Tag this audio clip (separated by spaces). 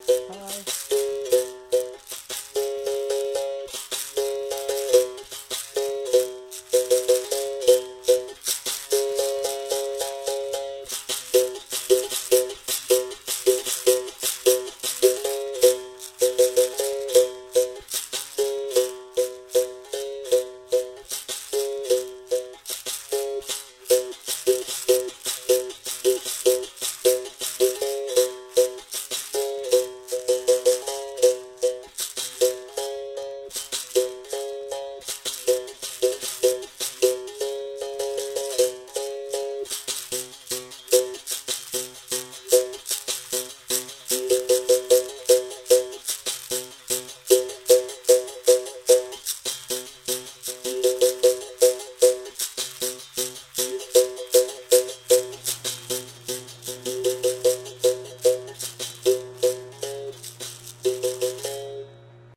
66 67 birmbau capoeira hdp2 music sennheiser tascam